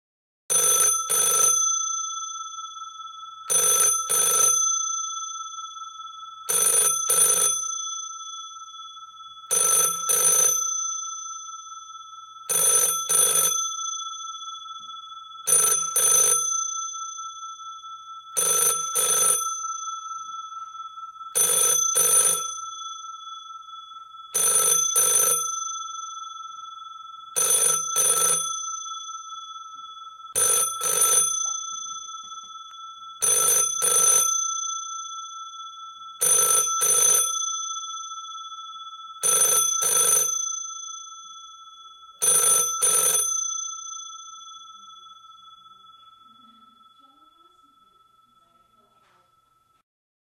Old Telephone Ring
Old style telephone ring, (with a real bell) used by Australian and British phone systems, remixed, many thanks to the original recorder who had to put up with loud kids, noisy mates with cell phones who stomp across the floor and wives that talk when they are recording.